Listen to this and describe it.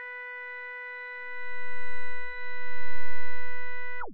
Multisamples created with subsynth using square and triangle waveform.
multisample, square, subtractive, synth, triangle